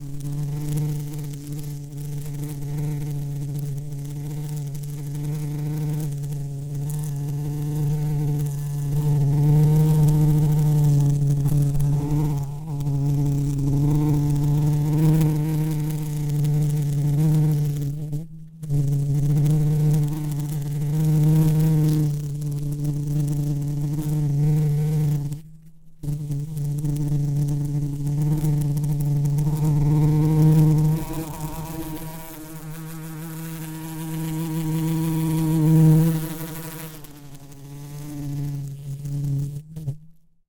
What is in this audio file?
A large bumblebee recorded using a Sony PCM D50 with the built-in mics.